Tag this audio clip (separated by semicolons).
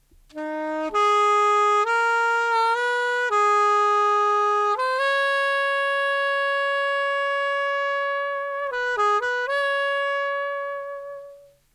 saxofon; saxophone; slow; instrument; woodwind; reed; sweep